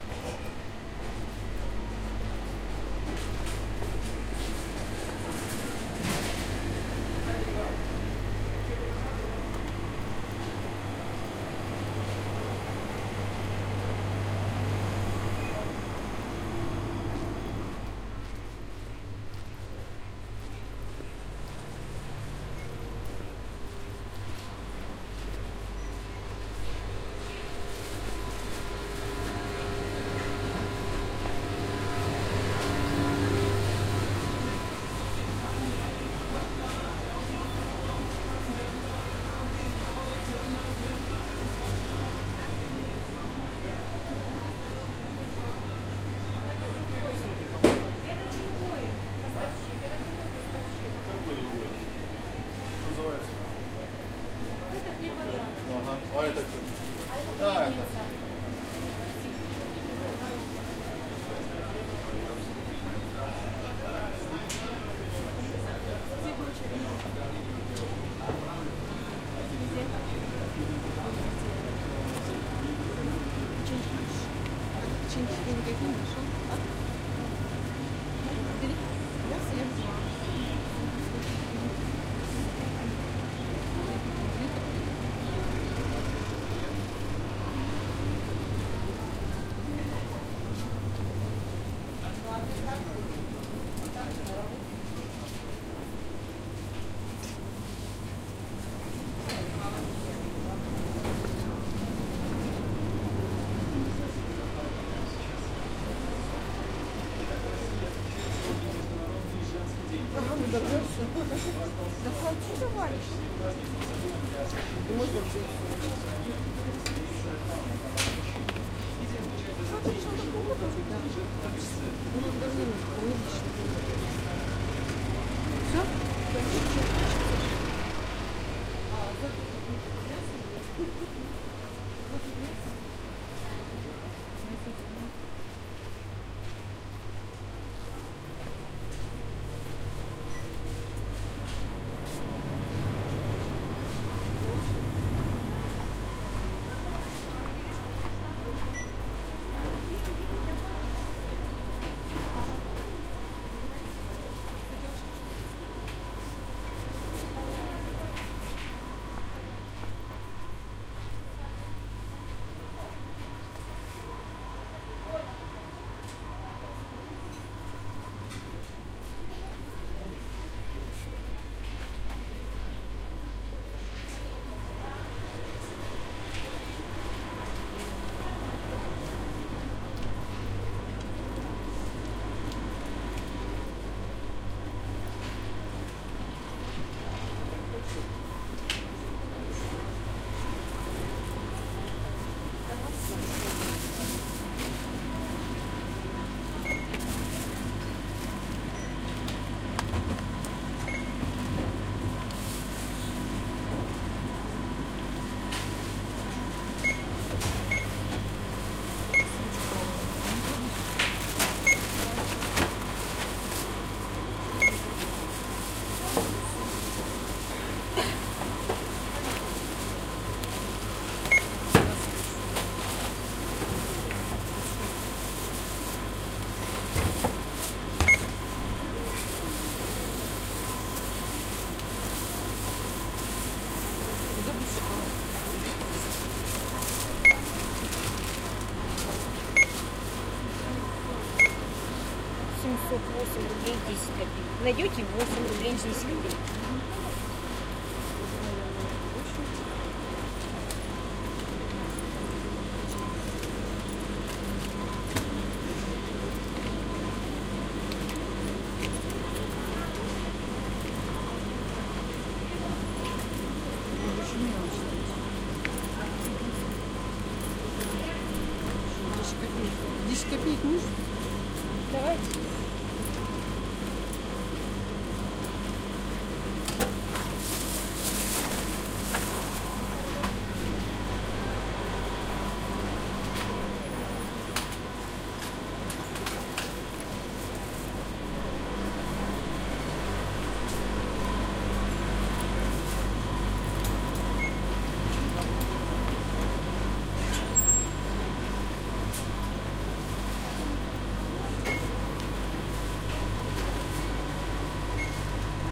walk thru shop
Walk thru supermarket. Different hum of refrigerators and other noises. Russian speech.
See also in the pack.
Recorded: 08-03-2013.
ambience, ambient, atmo, background-sound, cold-machine, hum, market, marketplace, shopping, soundscape